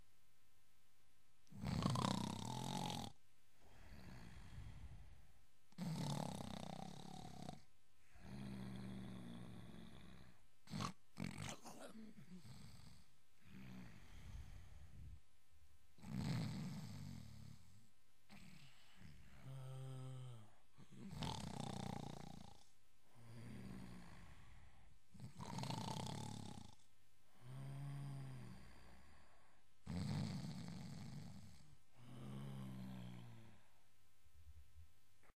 snoring long
A long clip of a person snoring. Other snoring-associated breathing sounds included.
snore, snoring, sleep, variation, nasal, long